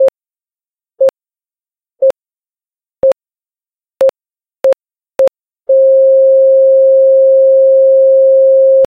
CHAMINADE Charlotte 2019 2020 Heartbeat
I generated a sine sound (600hz with a 0.7 frequency) and cut it so it lasted a very short time. I gave it a slightly lower pitch and duplicated it every second so it kind of sounded like a heartbeat on a monitor. Then, I placed the beats closer to each other, to imitate a racing heart, only to generate another sine with the same frequency and tonality in the end. I made it last a few seconds though, to make it sound like the heart had stopped beating.
heartbeat, beeping, heart-monitor